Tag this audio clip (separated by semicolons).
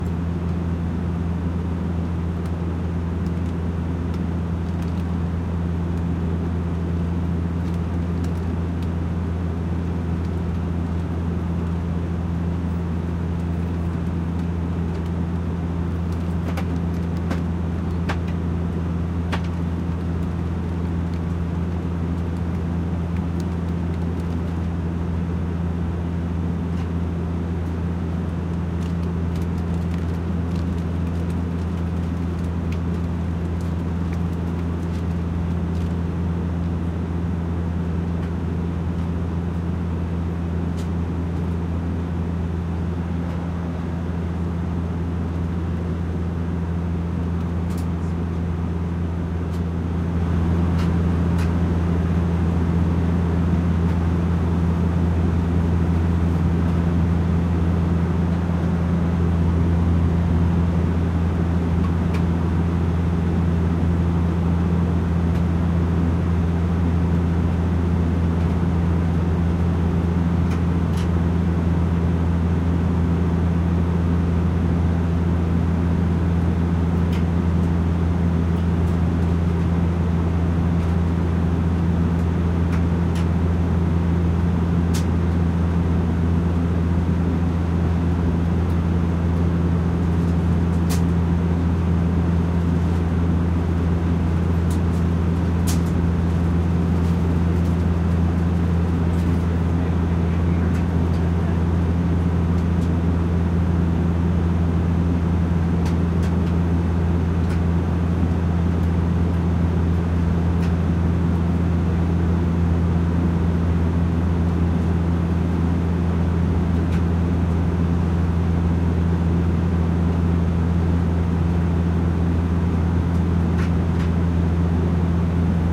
Int; Porter; Prop; plane